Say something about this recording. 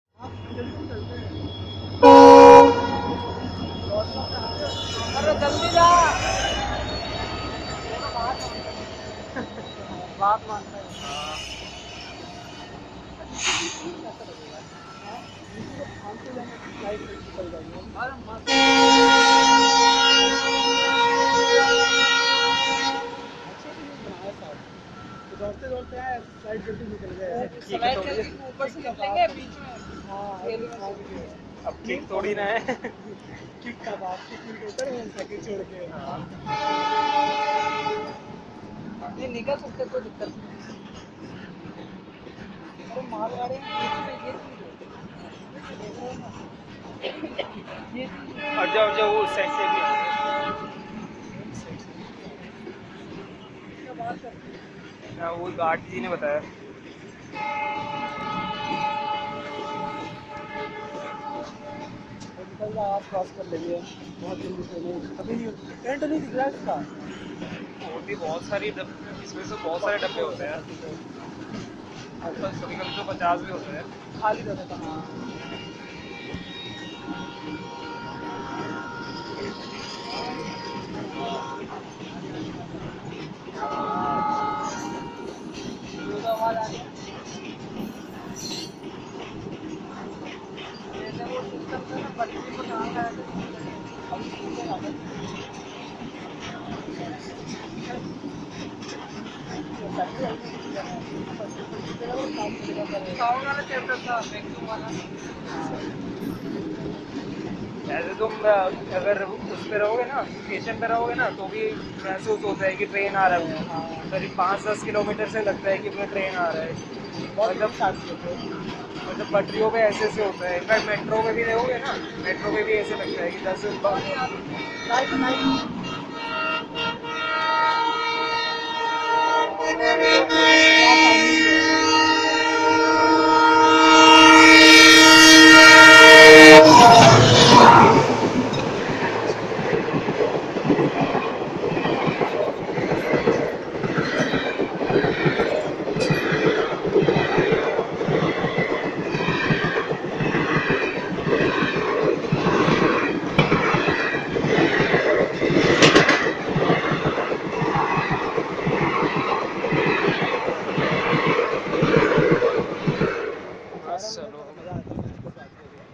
train sound with crowd
took this sound from a Railway Crossing Junction using a mobile phone
crowd, field-recording, people, train